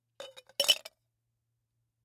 Ice Into Martini Shaker FF287
Dropping ice into martini shaker, ice hitting metal twice
ice, martini-shaker, metal